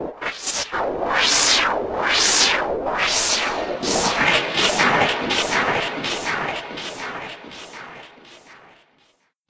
Shadow Warp 3
The third of it's kind in my library. I don't work with white-noise FX very much.
creepy, noise, sci-fi, special, spooky, warp